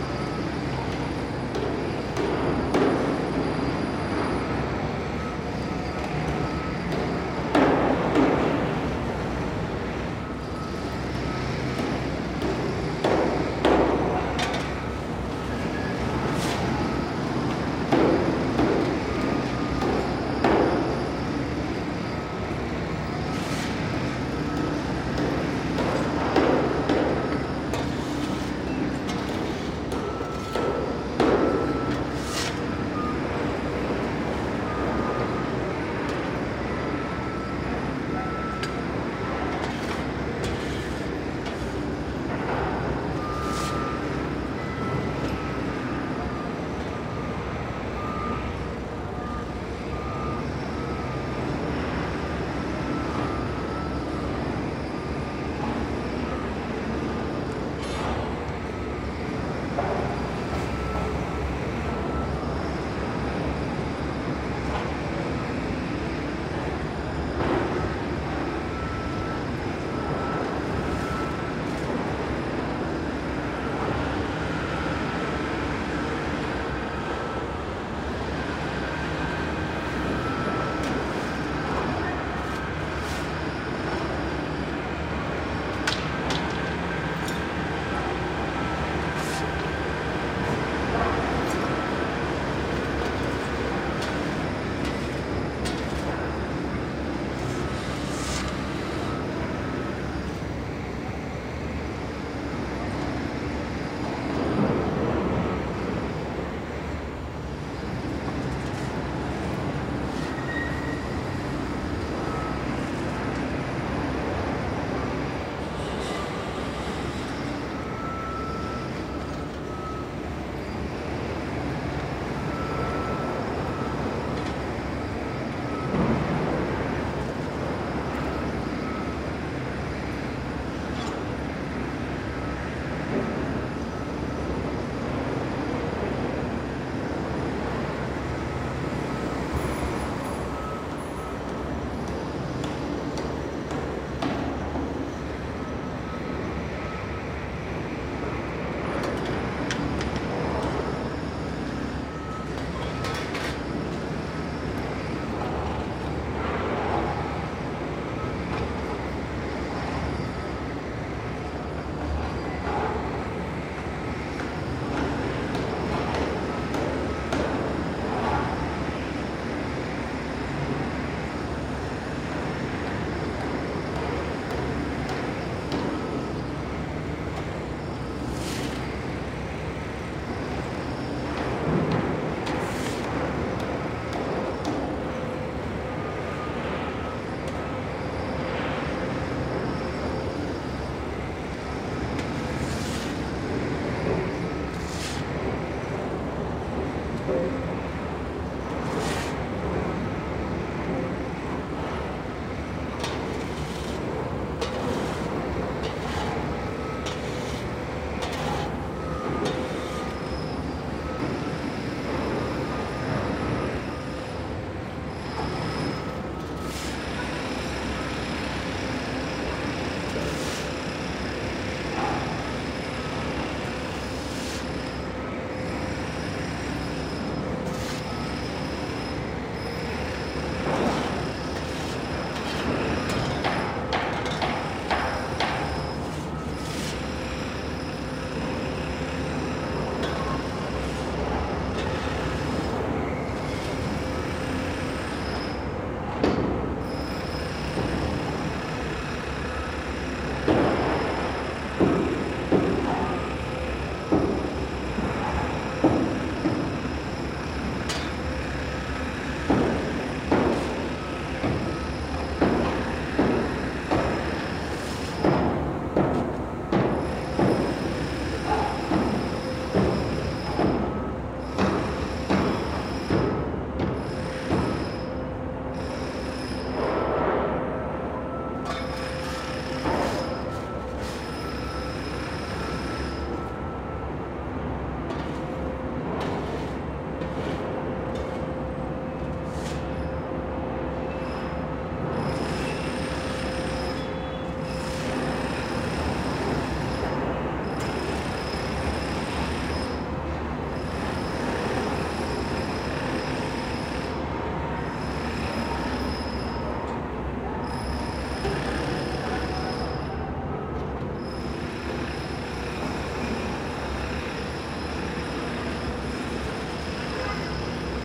AMB Ext Construction 001
This is a recording of men working at a construction site. You can hear individual hammers as well as a jackhammer and other machinery. Also, two men were shoveling refuse off a concrete platform and putting it into plastic bags.
Recorded with: Sanken CS-1e, Fostex FR2Le
Ambience, machinery, working, traffic, jackhammer, shovel, construction, hammer, men